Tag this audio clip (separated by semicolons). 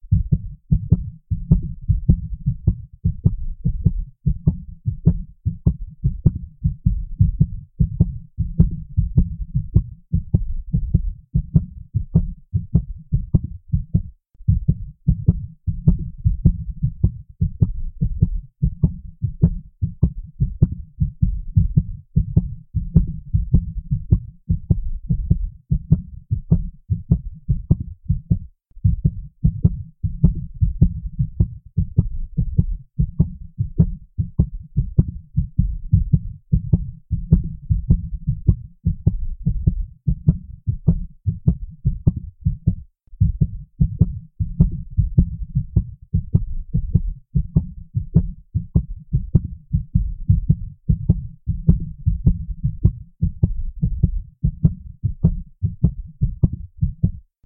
heart; heartbeat; heart-beat